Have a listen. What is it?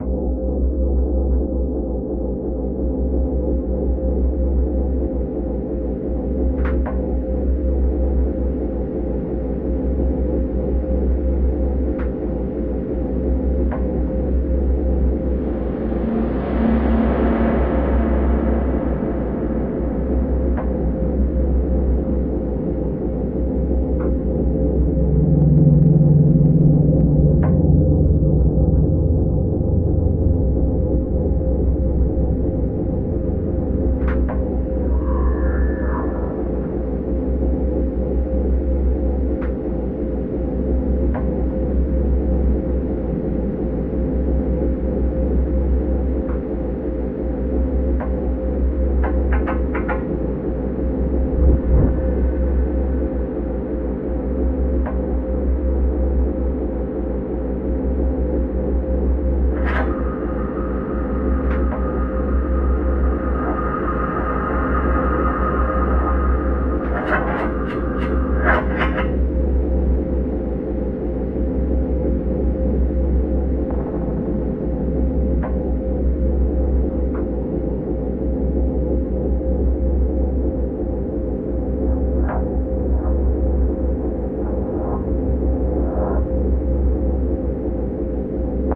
A very old production.
A simple dark ambient track, slow, brooding. It can be the dark cold regions of space, or the cobweb-filled but lifeless corners in the cellar of a haunted mansion.
Mostly VST synthesizers, lots of reverb effects and EQ's to shape the sound.
space, hidden, sad, melancholy, creepy, atmosphere, background, calm, ambience, drone, pad, contemplation, dark